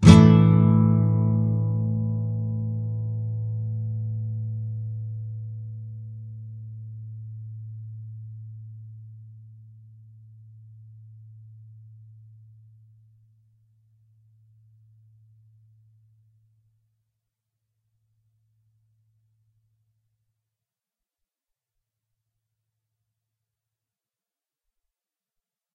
Asus full OK
Standard open Asus4 chord. The same as A Major except the B (2nd) string which has the 3rd fret held. Down strum. If any of these samples have any errors or faults, please tell me.
acoustic, clean, guitar, nylon-guitar, open-chords